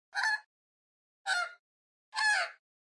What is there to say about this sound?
Australian Magpie - Gymnorhina tibicen - Squawk 1
A few nice clean squawks from an Australian Magpie (Gymnorhina Tibicen) standing on my front lawn. I have filtered out low frequencies (unrelated to the bird) from traffic in the background. Recording distance ~ 5 meters. Recording chain: Rode NT4 (stereo mic) – Sound Devices Mix Pre (Pre amp) – Edirol R-09 digital recorder.
squawk, bird, gymnorhina-tibicen, avian, australian-magpie